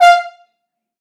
One-shot from Versilian Studios Chamber Orchestra 2: Community Edition sampling project.
Instrument family: Brass
Instrument: F Horn
Articulation: staccato
Note: E#5
Midi note: 77
Midi velocity (center): 42063
Microphone: 2x Rode NT1-A spaced pair, 1 AT Pro 37 overhead, 1 sE2200aII close
Performer: M. Oprean